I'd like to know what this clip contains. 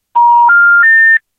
out of service europe
Out-of-service signal on european (excepting UK) telephony. Made with a VoIP call.